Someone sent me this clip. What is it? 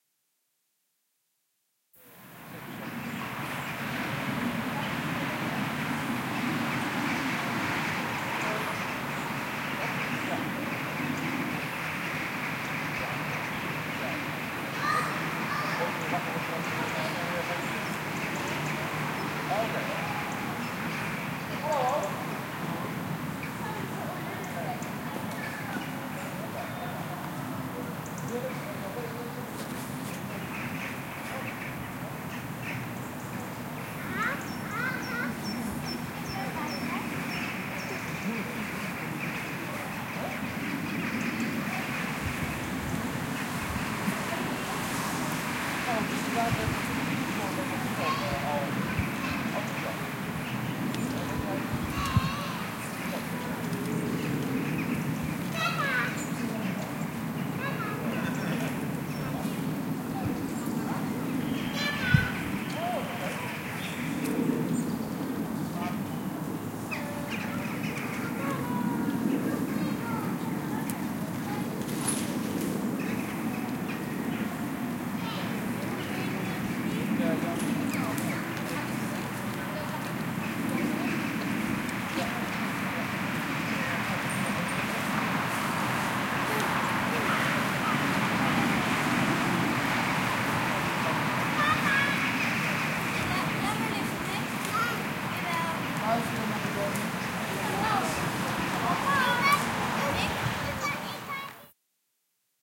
Park Zeist birds children walla october 2010
Zoom H4n X/Y stereo field-recording in Zeist, the Netherlands. General ambiance of park, residential.
atmosphere, walla, soundscape, background, ambient, netherlands, birds, background-sound, field-recording, atmos, ambiance, atmo, general-noise, park, zeist, ambience, children, dutch, holland